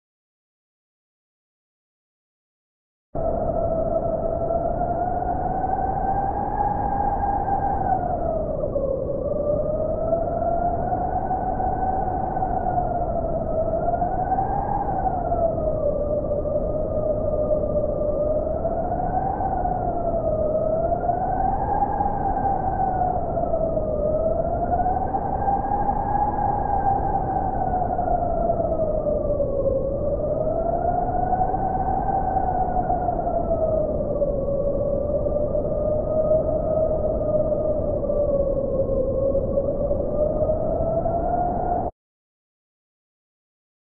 Synth Wind
some wind i created using white noise
mountain, noise, white, strong, arctic, wind